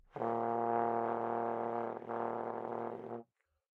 One-shot from Versilian Studios Chamber Orchestra 2: Community Edition sampling project.
Instrument family: Brass
Instrument: OldTrombone
Articulation: buzz
Note: A#1
Midi note: 35
Room type: Band Rehearsal Space
Microphone: 2x SM-57 spaced pair